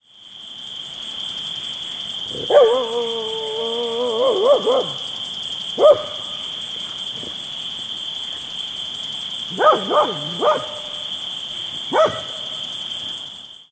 20160717 barking.night.51
Dog barking close, crickets in background. Recorded near Madrigal de la Vera (Cáceres Province, Spain) using Audiotechnica BP4025 > Shure FP24 preamp > Tascam DR-60D MkII recorder.